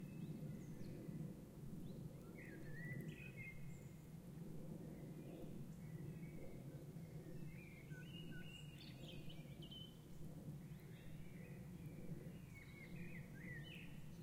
Forest Birds & Plane
Some Birds tweeting in a forest, while Plane in the background
Birds, industrial, Summer, Tweet, Tweeting, Wind